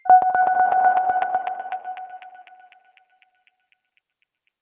MA SFX Bells 2
Sound from pack: "Mobile Arcade"
100% FREE!
200 HQ SFX, and loops.
Best used for match3, platformer, runners.
free-music, sfx, machine, noise, freaky, effect, abstract, electric, soundeffect, lo-fi, fx, glitch, sci-fi, loop, future, game-sfx, sound-design, digital, electronic